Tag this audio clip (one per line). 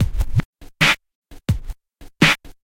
Drums
Sampled
Record
Snare
Tascam
Beat